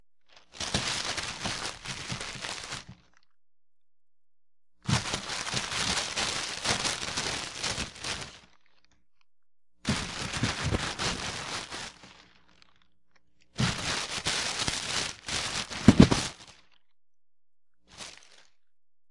paper02-crumpling paper#1
Large sheets of packing paper being crumpled into balls, like kids do with wrapping paper on Christmas/birthday presents.
All samples in this set were recorded on a hollow, injection-molded, plastic table, which periodically adds a hollow thump if anything is dropped. Noise reduction applied to remove systemic hum, which leaves some artifacts if amplified greatly. Some samples are normalized to -0.5 dB, while others are not.
christmas, newspaper, paper, wrapping